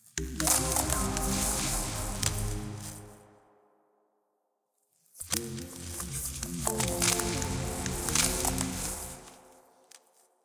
Abstract Musical / Melodic Cracking Texture
A musical / melodic cracking texture sound.
atmosphere, effect, glitch, background, soundtrack, ice, snow, atmo, synthesizer, sfx, cinematic, sci-fi, modular, film, ambiance, effects, drone, soundscape, specialeffects